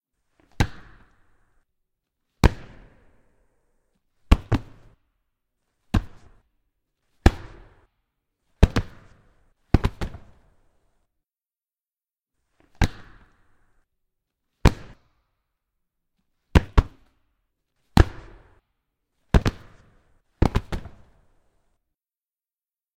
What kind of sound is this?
CLARKS Punches Hits Lighter
A series of dark hits, good for sweetening a scuffle or, I don't know, a basketball game.
thud, punch, hit